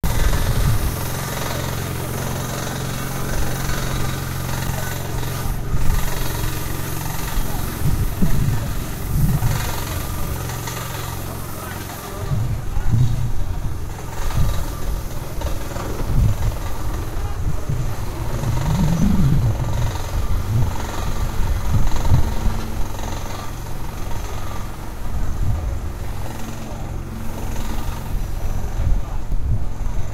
work in progress - lavori in corso
recorded by Roberto Giannessi
with tascam dr100
stereo
location : civitavecchia (Rome)
date : 07 07 2009
civitavecchia, work, roma, progress